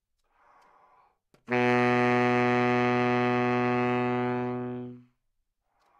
Sax Baritone - C#3
Part of the Good-sounds dataset of monophonic instrumental sounds.
instrument::sax_baritone
note::C#
octave::3
midi note::37
good-sounds-id::5538
baritone, sax, single-note